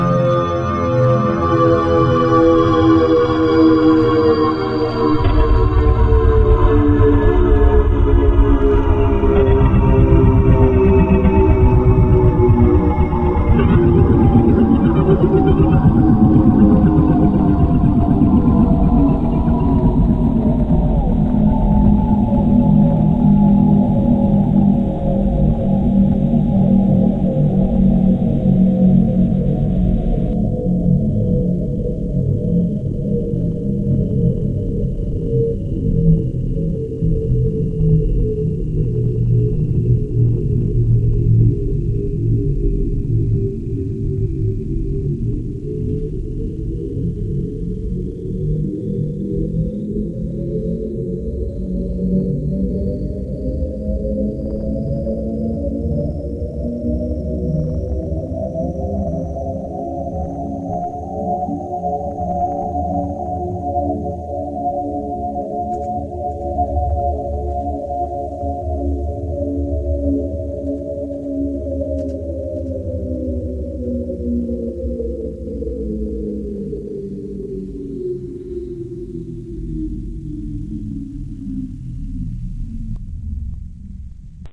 Well, what has the gaga found (yaaawn...a small sketch that will start your curiosity. I was just passing my bedroom window, when I heard a noise from somewhere. I went back to the window just to find my dogs yelloing and hide under my bed.I felt myy hair rise. I switched off the room light and stood still . : fumbled for the leve4r to open the window when there came flash that enlighted the whole town and a roaring, like from one hundred liners. Reminded of a beeswarm. There was nothing to see. The thundering moved to the southwest and I thoght they would disappear, but suddenly the nouise returned indicating sort of recognishing. seconds later there was silent again, i returned to my bedroom on shaking legs. The dogs did not came out, so I asked them to move so that I could join to them.
Azarea52, Fantasy, future, God, invasion, profesi, scifi, space